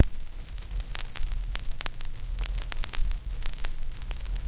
vinyl - in - precious memories
The couple seconds of crackle before the music starts on an old vinyl record.
Recorded through USB into Audacity from a Sony PSLX300USB USB Stereo Turntable.
crackle, vinyl-record, pop, album, lofi, vintage, noisy, record, surface-noise, noise, vinyl, turntable, LP